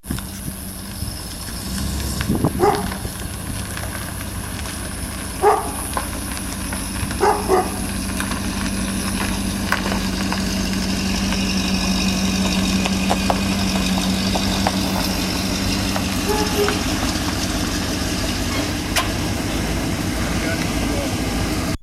MH wDog
Motor Home sound with dog in background.
Drive, Home, Motor, Motorway, Transport, Travel